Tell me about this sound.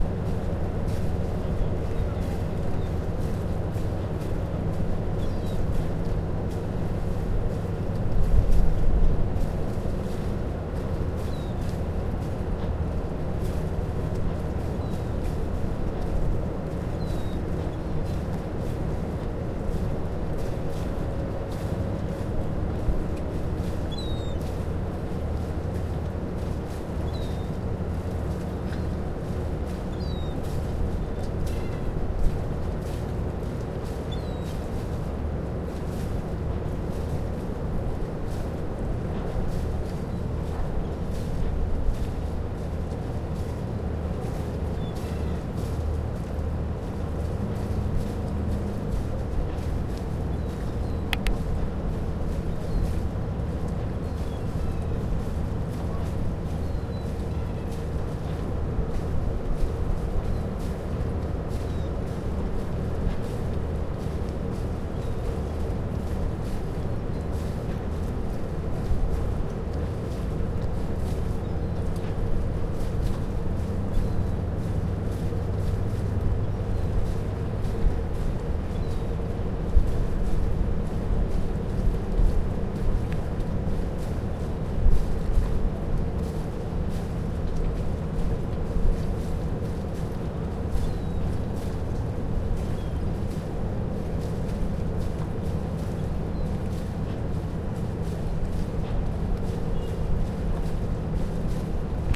Harbour Seagulls Day
Afternoon in harbour full of fishing boats. You can SOFTLY hear seagulls in the backgorund.
Bay, Engines, Fishing, Harbour, Sea, Seagulls, Ships, Shore, Waves, boats